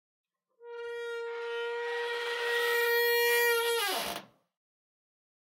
door squeaking 02
door squeaking. Horror suspence like squeak
door, suspense, foley, wood, squeaky, open, horror, soundeffect, squeak, creak, thriller